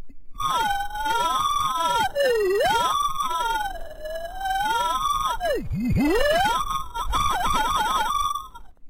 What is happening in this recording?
A robot malfunctioning. Good for sci-fi. Sounds like R2-D2s confused cousin.
effect, Robot, science, sci-fi, soundscape